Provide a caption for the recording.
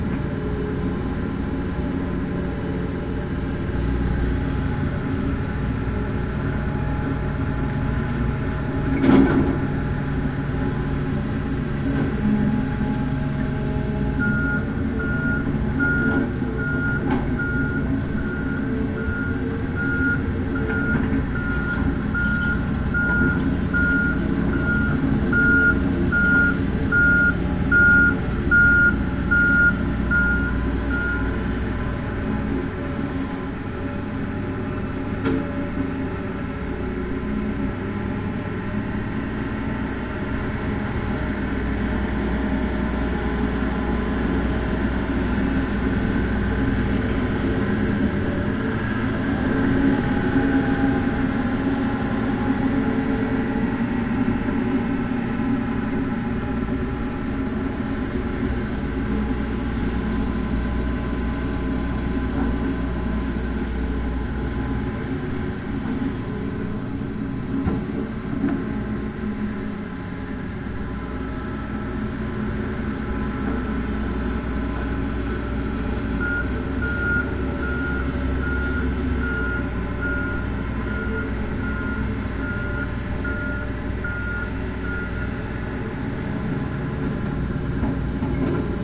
AMB INT CONSTRUCTION FROM APARTMENT POV LOOP
Looping ambiance of Trucks and machinery building an apartment, early state, from inside another (finished) apartment by it. Recorded at approx. 5 meters from the window in front of the area with a Tascam Dr-40.
construction, engine, engines, inside, interior, machinery, truck, trucks